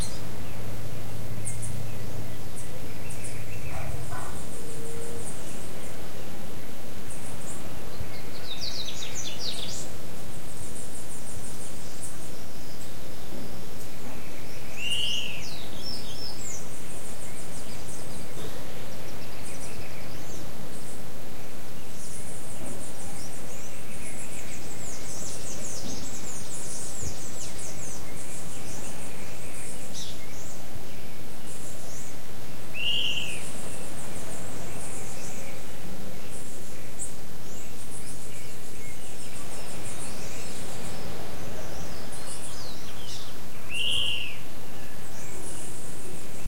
morning-birds short03
Recording taken in November 2011, at a inn in Ilha Grande, Rio de Janeiro, Brazil. Birds singing, recorded from the window of the room where I stayed, using a Zoom H4n portable recorder.
morning, field-recording, birds, bird, rio-de-janeiro, ilha-grande, brazil